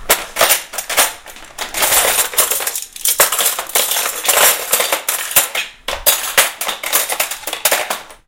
Various sounds from around my kitchen this one being cutlery in the drawer